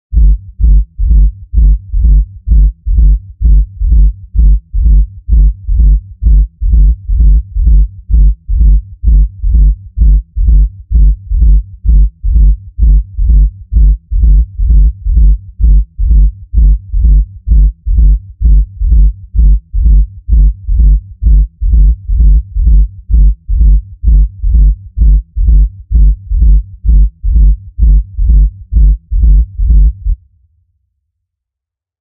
Most common sound we hear from outside the club.